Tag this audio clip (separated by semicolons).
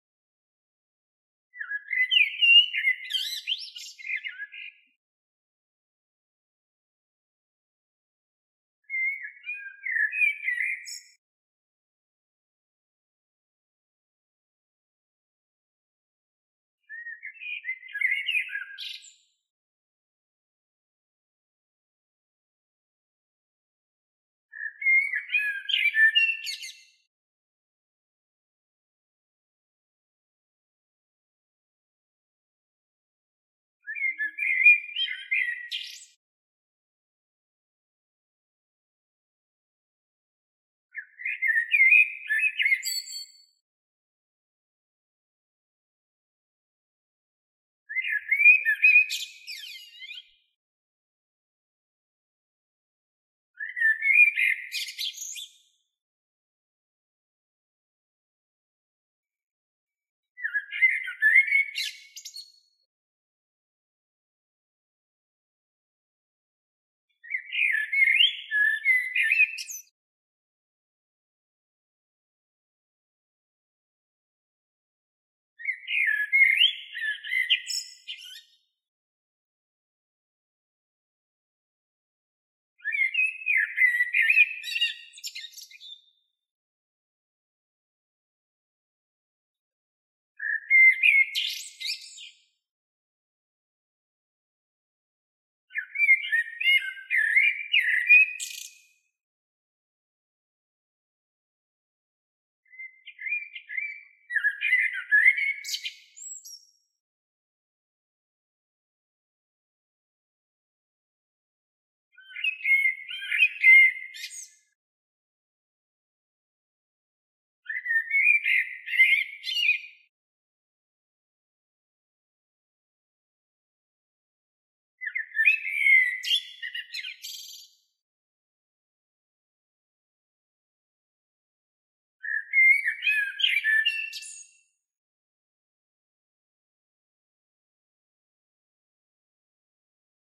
blackbird; dawn; flute; garden; isolated; park; song; whistling